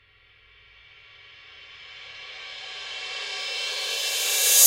Rpeople RevCrash1
Reversed Crash 1
cymbal, reversed-crash, rpeople